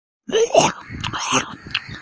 This is a sound that I created of a zombie eating.
scary, zombie, terrifying, spooky, growls, sound, sounds, thrill, eating, femaile, terror